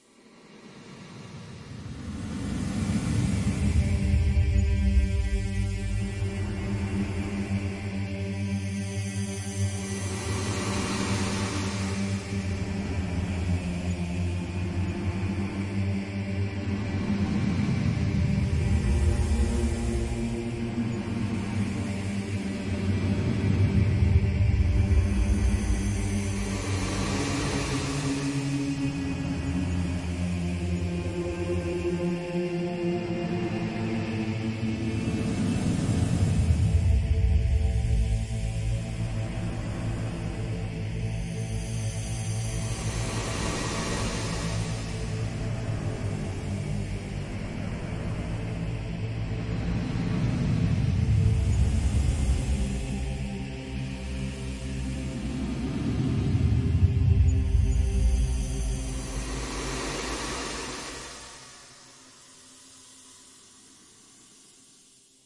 creepy soundscape remix zagi2 hard rock loop
I used the paulstretch option in audacity
original hardrock loop by zagi2
ambient, atmosphere, cave, cinematic, dark, haunting, horror, processed, scary, soundscape, weird, zagi2